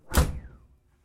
Slamming a door in a heavy insulated room. The pushed air can be heard on the tail of the sound. Would be a great sound for a spaceship door close. Great for impacts.
Any credit is more than welcome.
space, air, door, hit, impact, shut, slam, metal, doors, mechanical, tail, sting, ship, insulated, closing, close